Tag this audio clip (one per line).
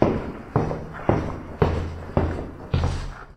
footstep
foot
footsteps
walking